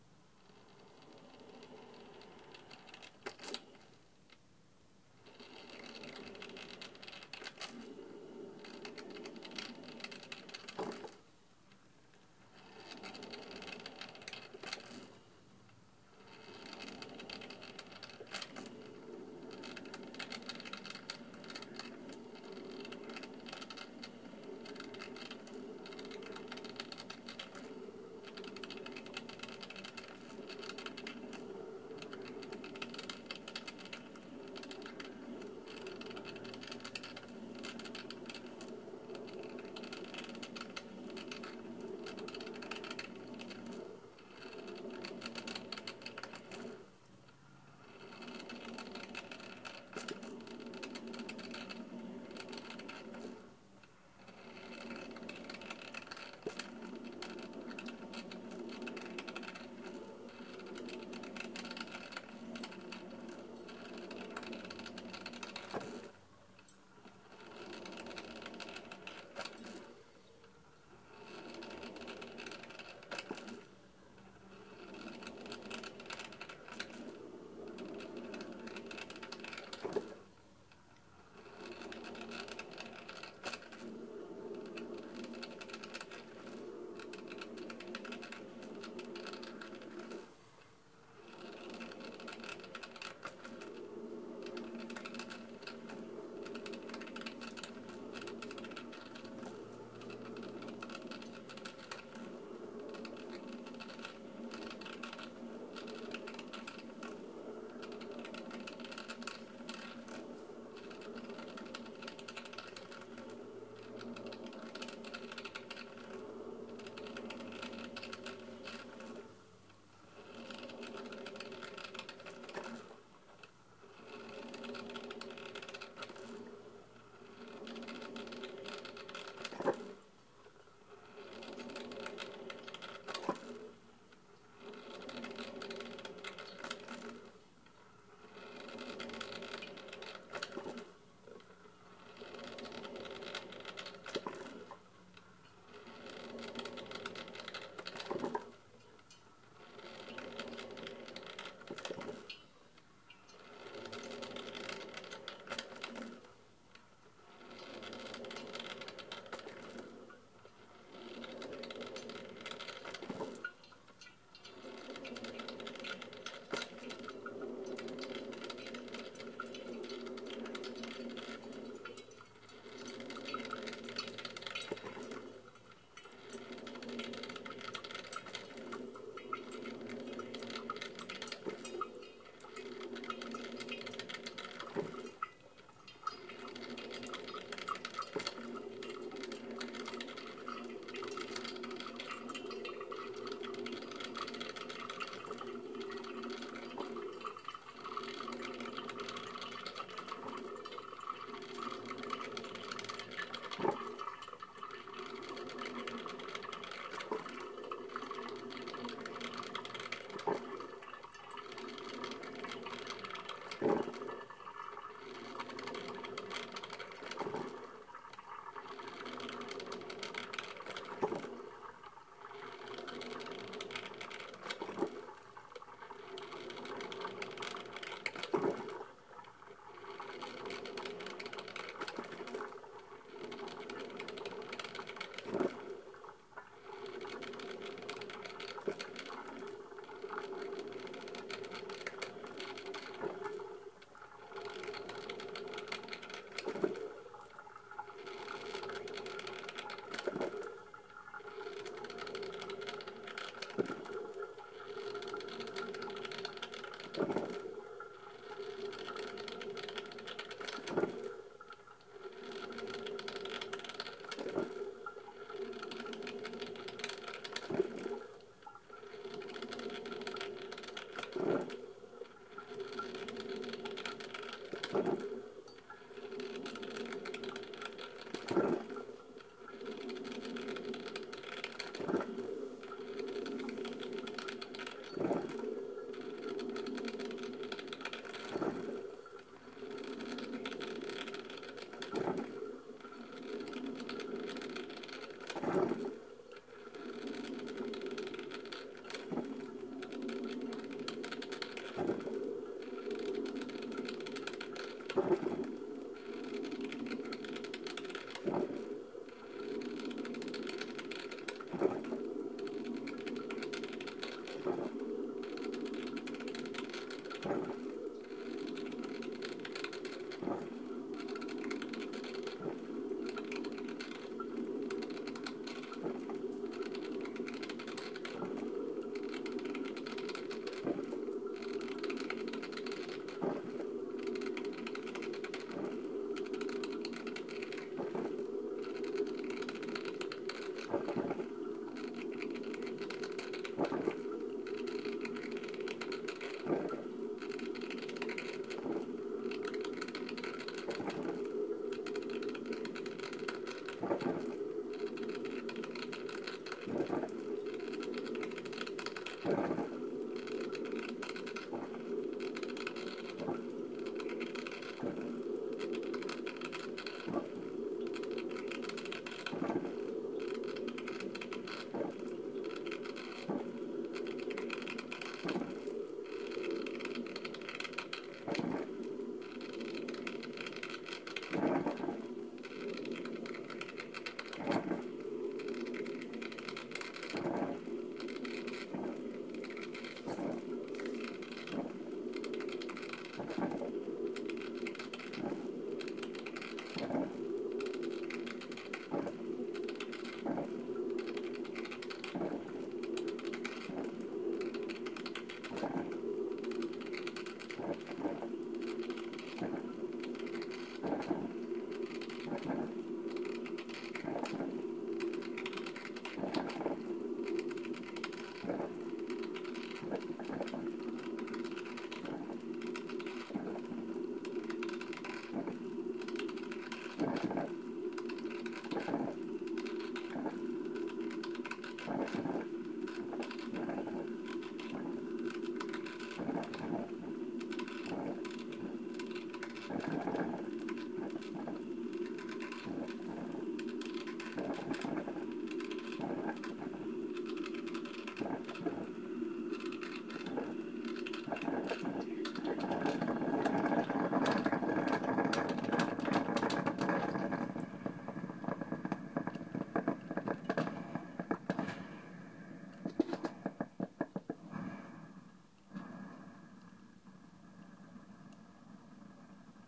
Coffee Brewing Background
Brewing coffee in my room
general-noise, atmosphere, ambience, ambient, coffee, background, soundscape, coffee-machine, brewing